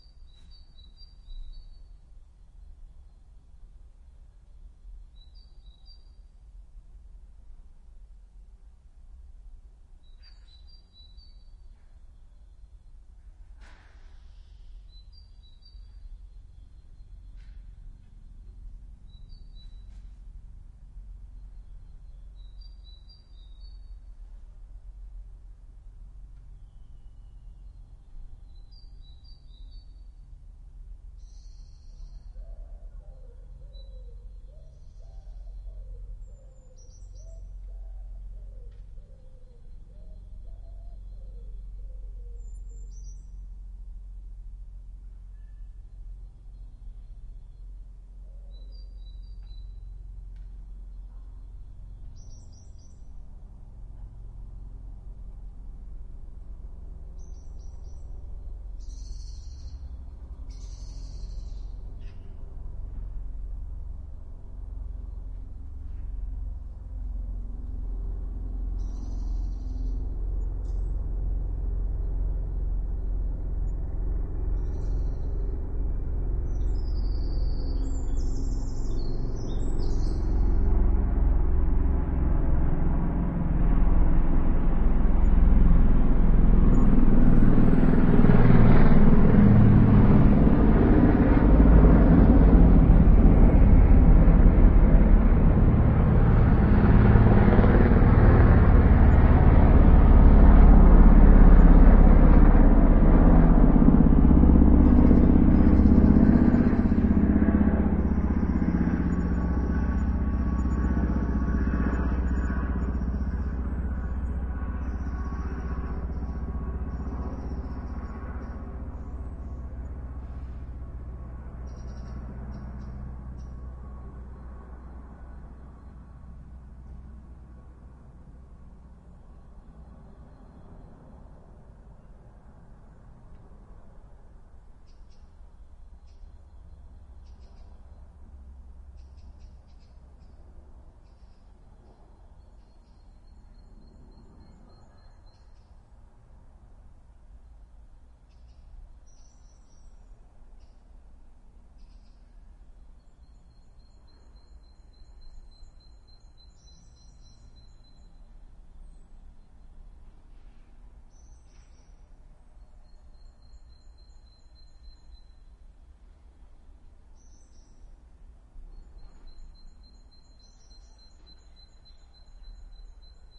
Two helicopters flying over a backyard in town. Somehow disturbing, as the sound came suddenly, without much warning.
iriver ihp-120 recorder and Panasonic microphone capsules.
field-recording, helicopter, helicopters